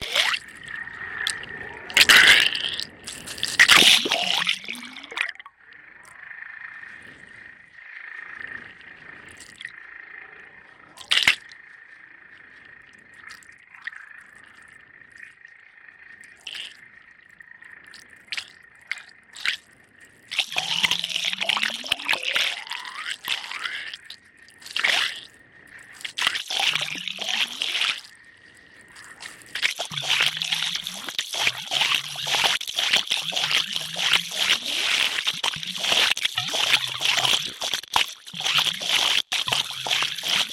Hydrophone pond phaser effect
Hydrophone recording from a pond with a phaser effect
Hydrophone, phaser